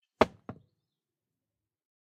ARROW TARGET IMPACT SINGLE ARCHERY 05
Direct exterior mic recording of an arrow being fired from 40lbs English Longbow onto a fabric target
Recorded on rode shotgun mic into Zoom H4N.
De noised/de bird atmos in RX6 then logic processing.
arrow, bow, bullseye, foley, warfare